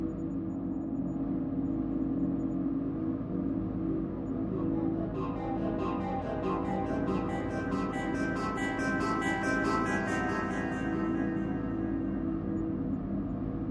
Atmospheric Disturbance 140 bpm Dm
Good for drift sequences and background sounds.
ambient, atmospheric, disturbance, jungle, pad, soundscape, space